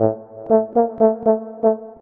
120 Num AA# bonehook
trombone sound loop
120bpm, hook, loop, sequenced, trombone